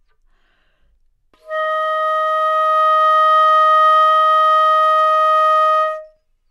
overall quality of single note - flute - D#5
Part of the Good-sounds dataset of monophonic instrumental sounds.
instrument::flute
note::Dsharp
octave::5
midi note::63
good-sounds-id::31
dynamic_level::mf
multisample, neumann-U87, Dsharp5, good-sounds, flute